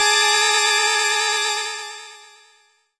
PPG 011 Dissonant Organ Chord E5
This sample is part of the "PPG
MULTISAMPLE 011 Dissonant Organ Chord" sample pack. It is a dissonant
chord with both low and high frequency pitches suitable for
experimental music. In the sample pack there are 16 samples evenly
spread across 5 octaves (C1 till C6). The note in the sample name (C, E
or G#) does not indicate the pitch of the sound but the key on my
keyboard. The sound was created on the PPG VSTi. After that normalising and fades where applied within Cubase SX.
chord, multisample, dissonant, ppg